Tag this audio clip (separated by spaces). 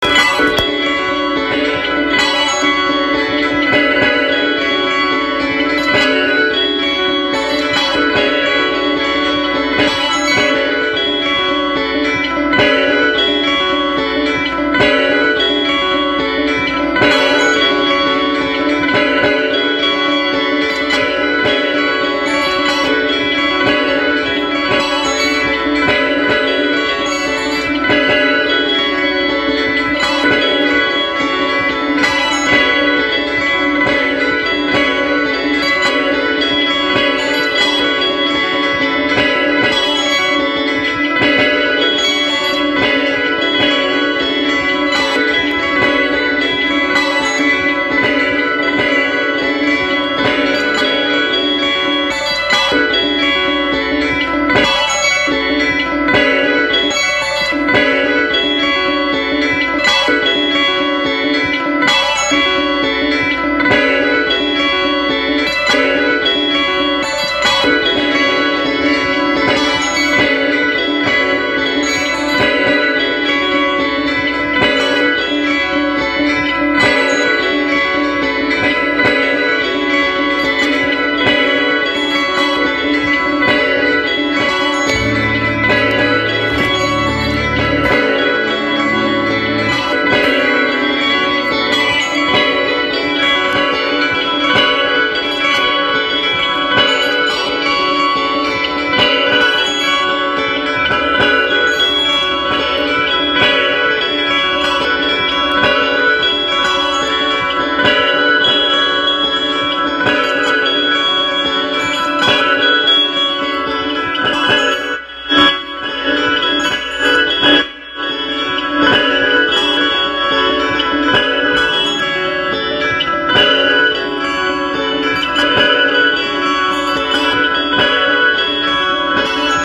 pedals loop guitar